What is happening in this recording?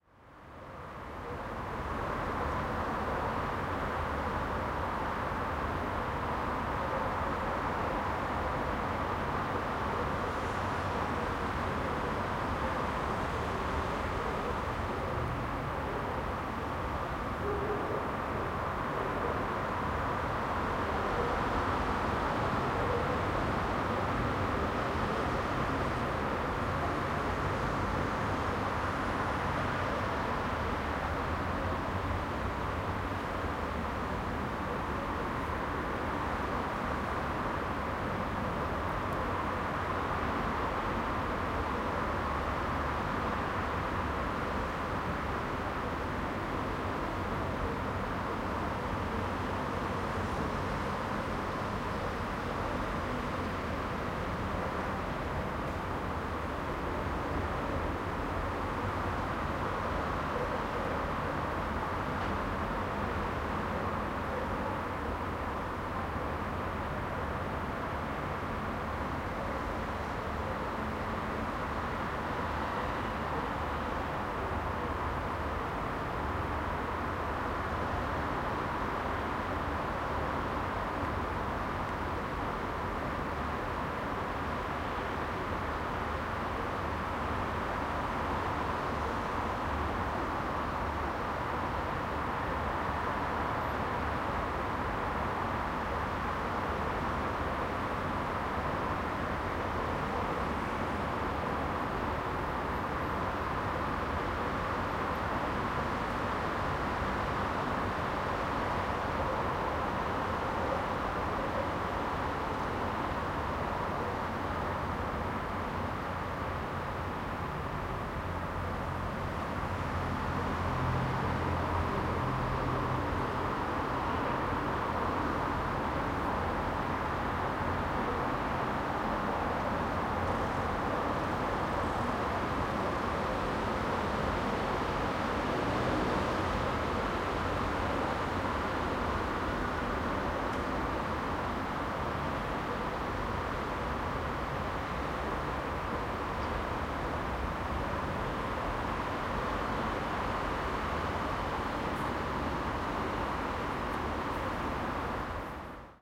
City streets from far away
Recorded from a window. You hear noises from the city, mostly the sound from cars on big street.
Recorded in Genoa, Italy.
field, ambient, field-recording, noise, ambiance, atmo, Italian, atmos, streets, city, town, recording, car, traffic, general-noise, Italy, street, atmosphere, down-town, background, soundscape, cars, ambience, background-sound, atmospheric